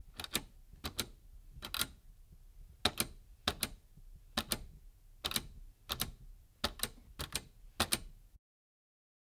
FXLM lightswitch tv studio close on off
The lightswitch in a tv studio being switched on and off several times. Sennheiser MKH416 into Zoom H6.